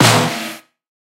A noisy snare for indus or fat tracks.
drum, fat, heavy, dnb, hardstep, bass, snare, dirty, distortion, industrial, acoutic, noisy